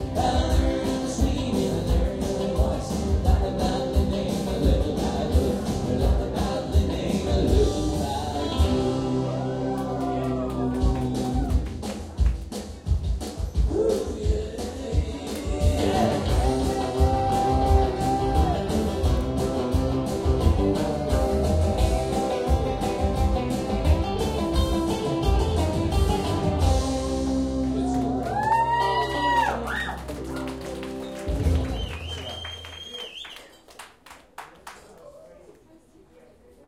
pub Vegas4
Atmosphere in the beer restaurant "Vegas" in the Omsk, West Siberia, Russia.
People drink and chatting and having fun, clinking glasses, dishes...
End of musical composition. Applause.
Recorded: 2012-11-16.
AB-stereo
song, noise, beer-restaurant, West-Siberia, people, Omsk, 2012, Russia, clinking-glasses, Vegas, guitar, rock-n-roll, pub, dishes, clinking, restaurant, music, drink, drunk, glass